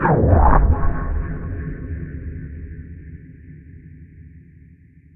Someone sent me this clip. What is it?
there is a long tune what i made it with absynth synthesiser and i cut it to detached sounds
ambience, ambient, deep, digital, drone, electronic, experimental, fx, horror, noise, sample, sound-effect, space, synth